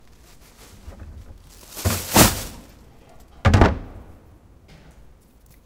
Throwing Away Plastic Trashbag
Throwing away a bag of trash into the bin.
Recorded with Zoom H2. Edited with Audacity.
junk, plastic, domestic